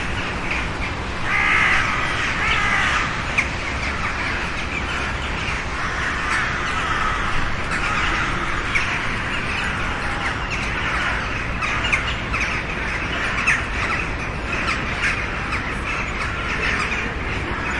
bridsound crows chatting-screaming+citysound 001
crows screming and chatting + city sound in the background
atmosphere, crows, background-sound, field-recording, soundscape, ambient, outdoor, atmos, ambience, city, atmo, chatting, birds, ambiance, screaming